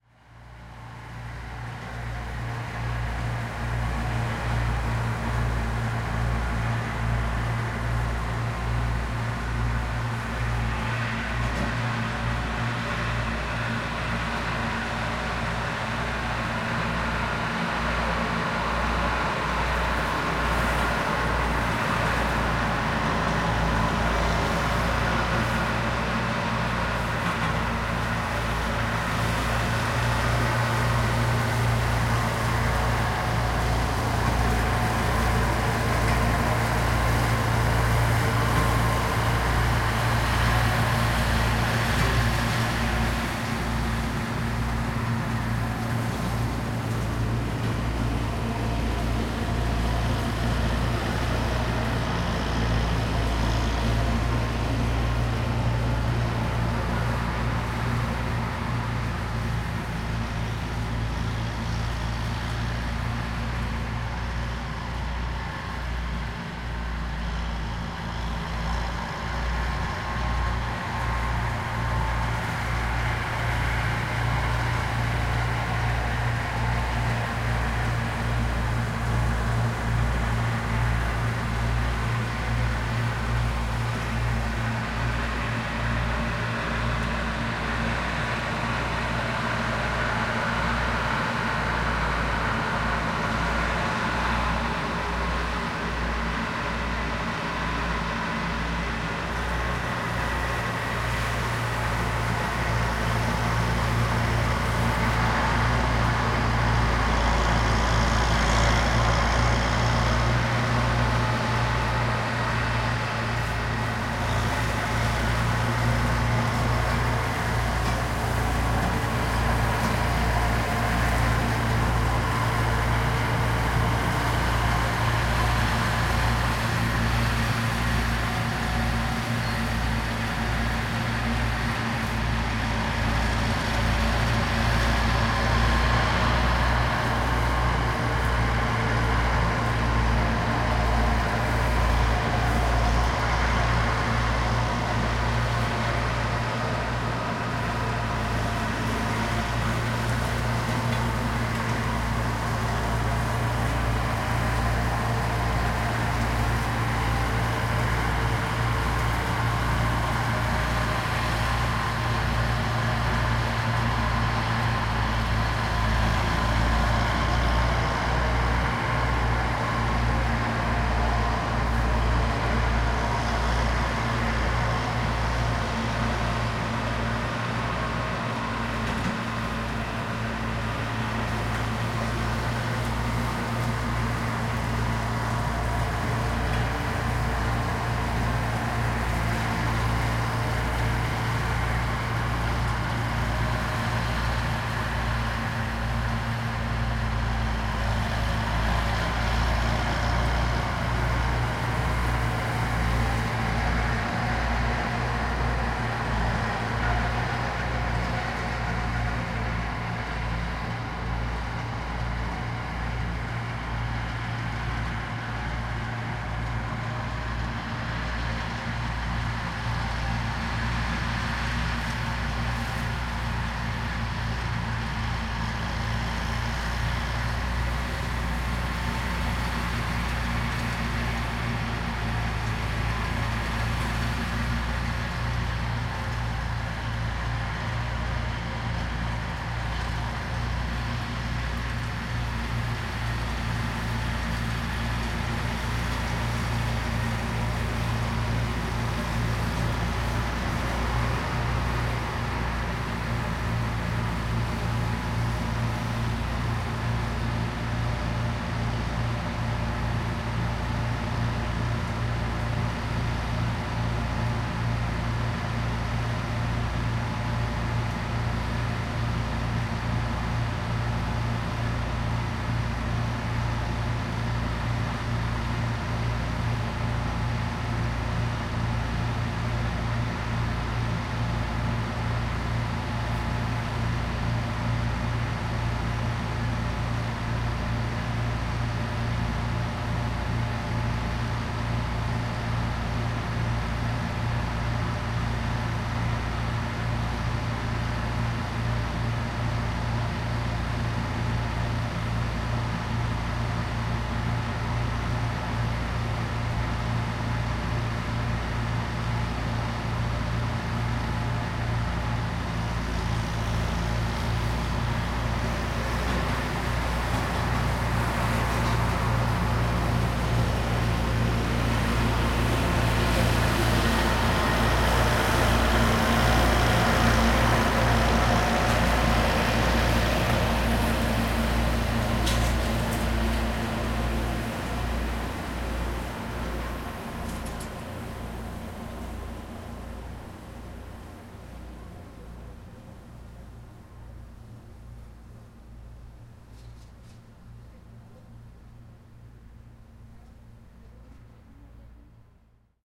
Tractor mowing the fields
A tractor is passing by the microphone multiple times mowing a big field.
Recorded in a farmhouse in Gasel, Switzerland.
countryside, pasture, rural, grazing, agriculture, soundscape, ambient, field, ambiance, ambience, tractor, estate, farmland, recording, atmosphere, farming-land, farming, meadow-land, meadow, landscape, country, agricultural, fieldrecording, meadowland, land, field-recording